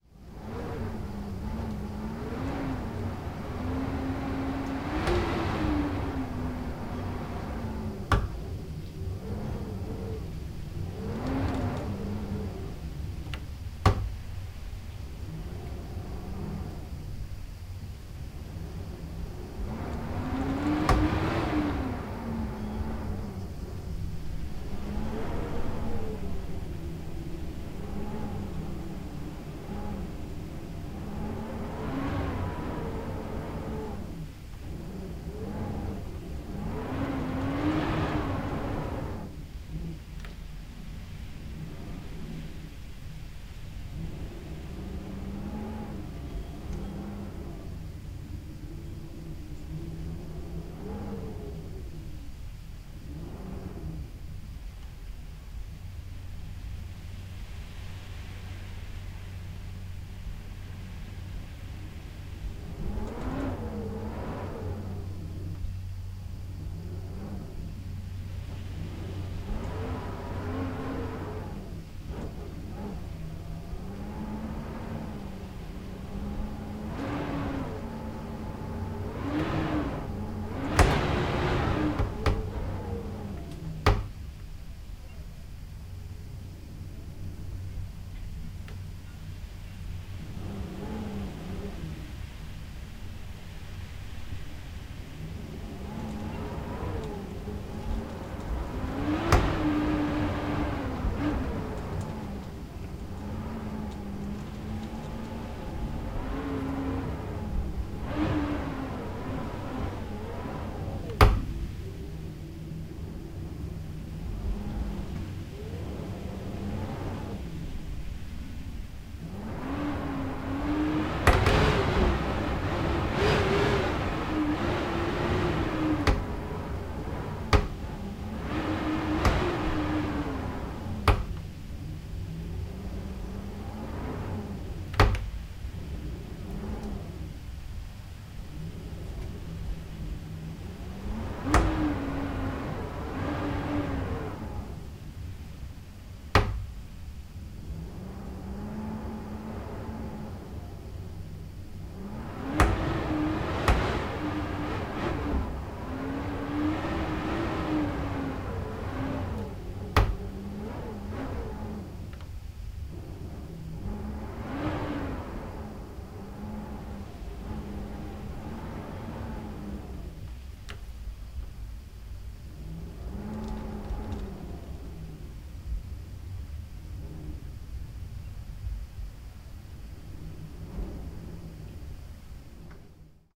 wind bura
Mono recording of strong wind, captured from the inside of the old house. You can hear occasional cracklings of the door and cicada concert outside. Recorded with DPA-4017 -> SD-552.
bura, croatia, DPA-4017, howling, interior, old-door, SD-552, sepurine, strong-wind, wind